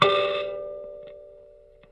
44kElectricKalimba - O1harmonic
Tones from a small electric kalimba (thumb-piano) played with healthy distortion through a miniature amplifier.
amp, bleep, blip, bloop, contact-mic, electric, kalimba, mbira, piezo, thumb-piano, tines, tone